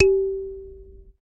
SanzAnais 67 G3 doux prc
a sanza (or kalimba) multisampled
african, kalimba, sanza